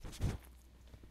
Dog sniffing recorder
beagle, pooch, puppy, dog, nose, sniff